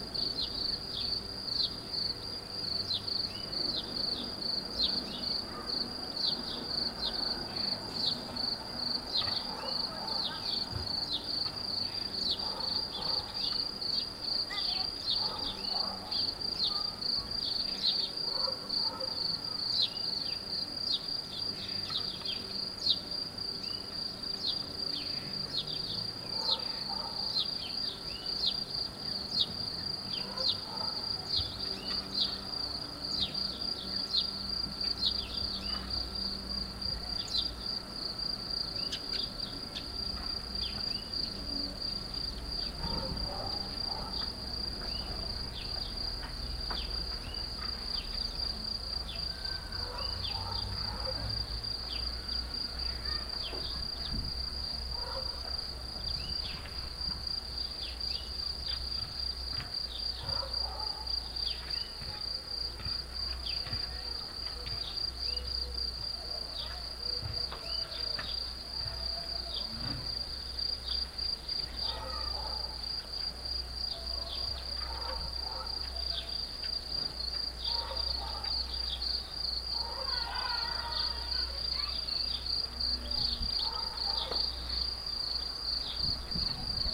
Crickets from my garden. I recorded it by my MP3 player.
cricket, ambience, spring, relaxing, field-recording, summer, relax, entertainment